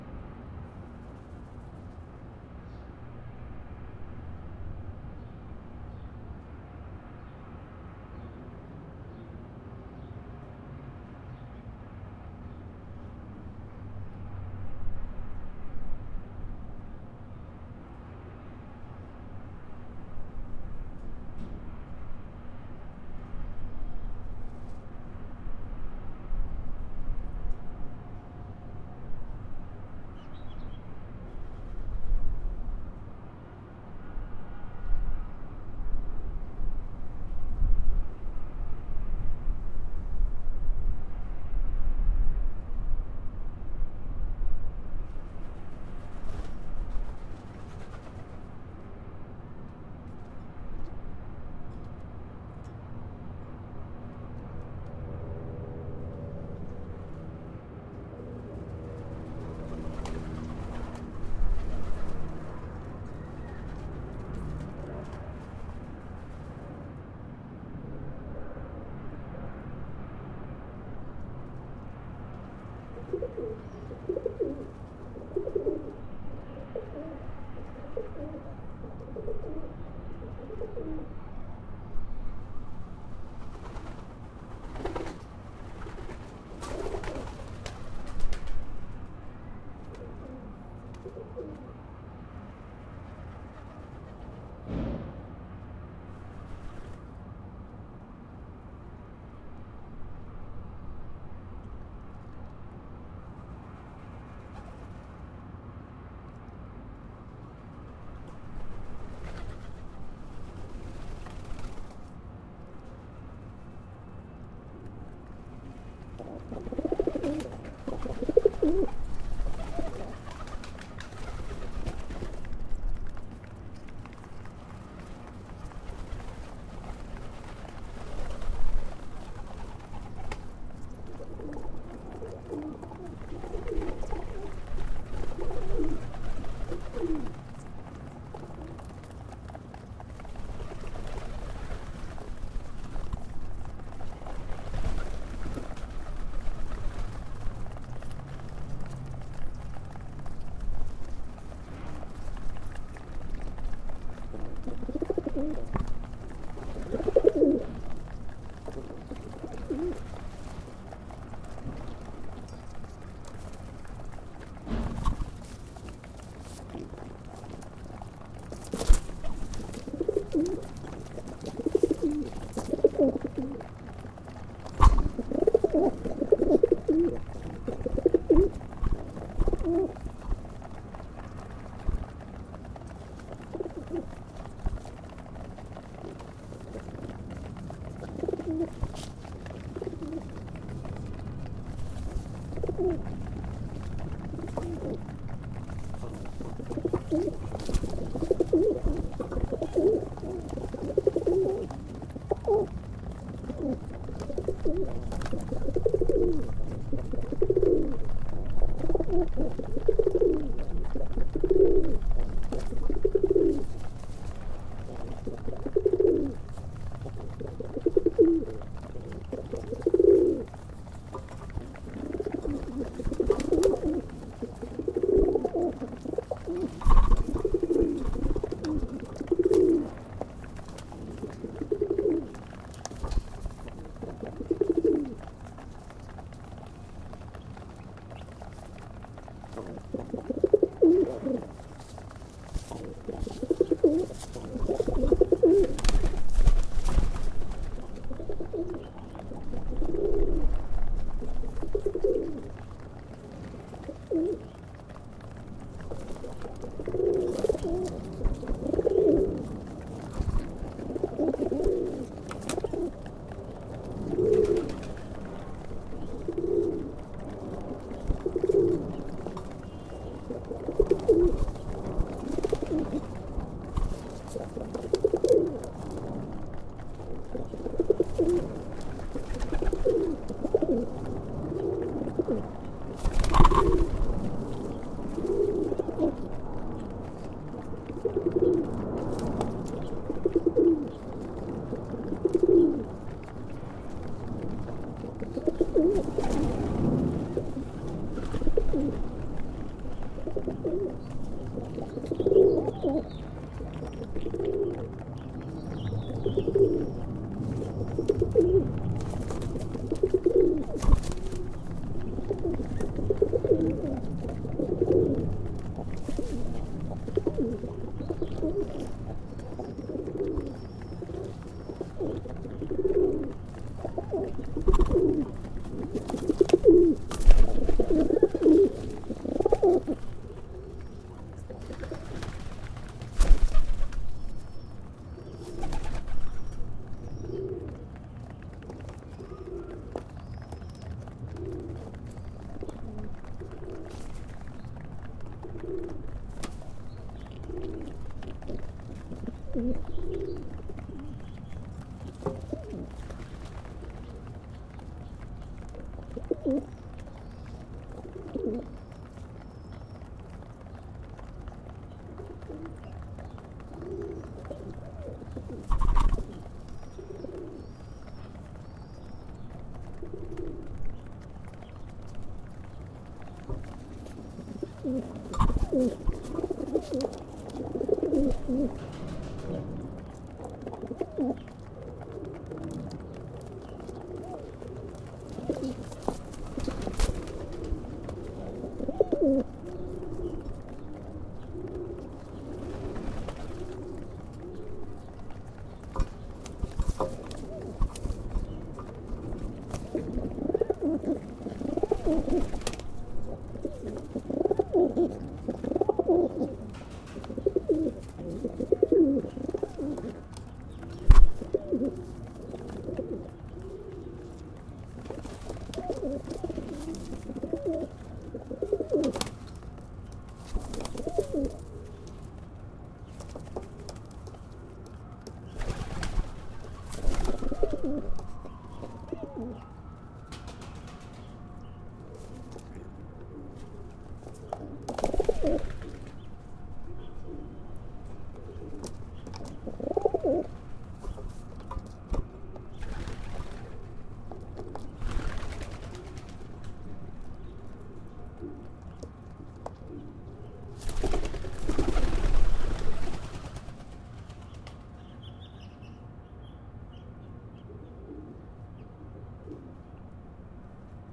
B18h13m32s08apr2008 MonoNormalizeCut
During my stay in Mexico City, my neighbour had the habit to feed the pigeons living on our buildings roof. One day i took the opportunity to record this spectacle. In the back you hear the ambient of Mexico-City, the Mic (Sennheiser ME66) was quite close to the birds, so watch out, sometimes they hit the Microphone with the wings and beaks. Recorded with Fostex FR2-LE
ambient, birds, coo, cooing, feeding, mexico, mono, pigeon